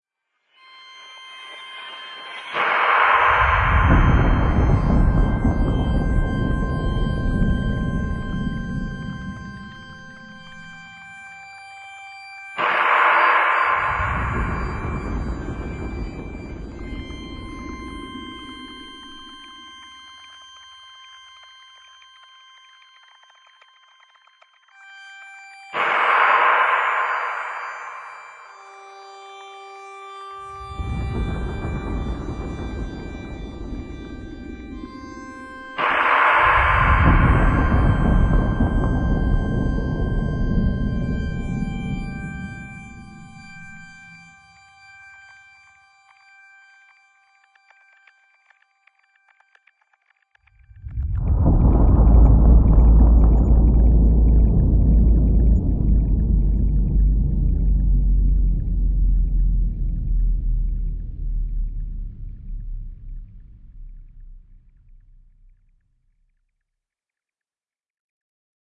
2 synthesizers with slowed down crash/explosion and deep, down-pitched cello at the very end.
Insane amount of post-processing.
BPM 75
Don't forget to contribute and share where used ;)
ambience, atmos, dark, deep, deep-space, long-reverb-tail, noise, soundscape, synthesizer, thrill